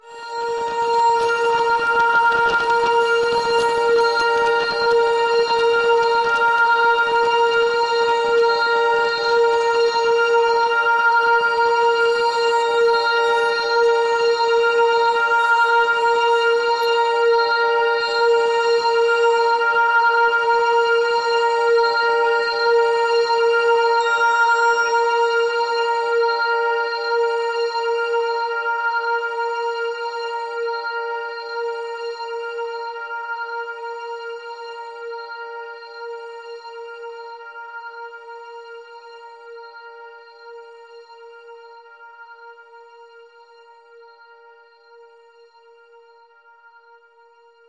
This is a deeply textured and gentle pad sound. It is multisampled so that you can use it in you favorite sample. Created using granular synthesis and other techniques. Each filename includes the root note for the particular sample.